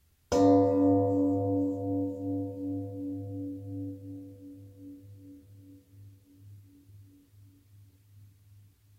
knock pot cover 1
test my recorder by cellphone and pot cover